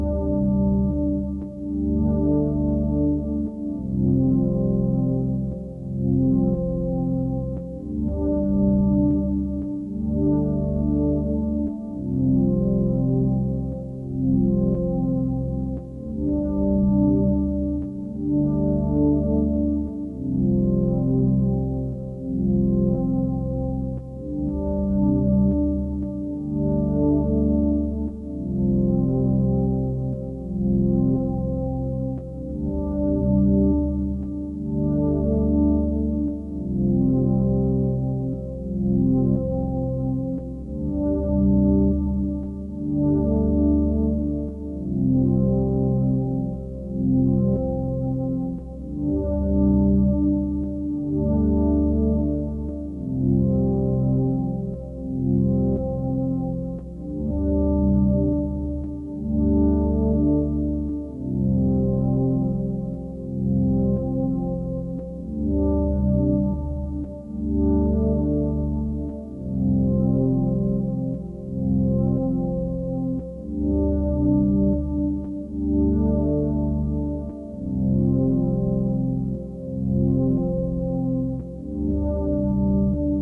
volca fm on ambient loop